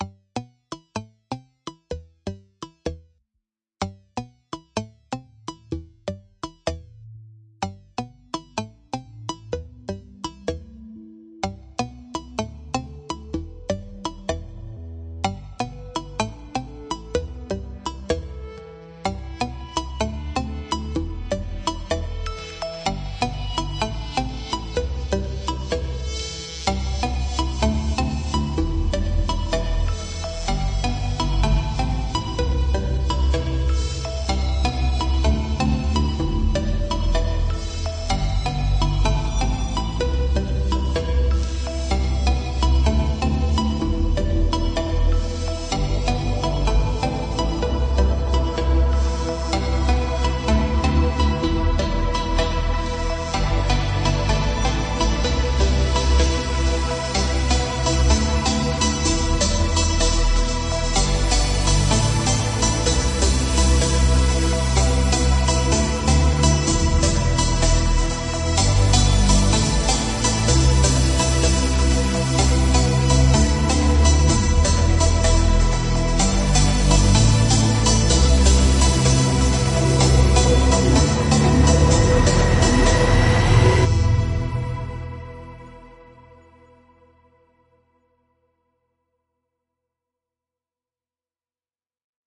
Glorious buildup one by DSQT
atmosphere, buildup, Synth